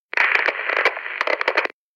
radio static 01
static, radio